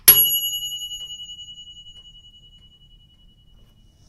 toaster oven ding
Single alarm ding from a toaster oven.